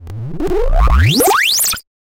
Glitch 1 - Rising pitch
A glitch sound effect generated with BFXR.
glitch, lo-fi, noise, bfxr, digital